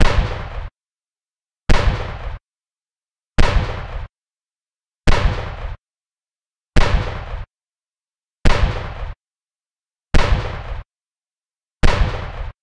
A mono simulation of 8 sequential mine blasts created in response to a request by metalmelter.
mining, blast, mine, blasts, mono